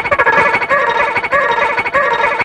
Digi la
digital, fx, harsh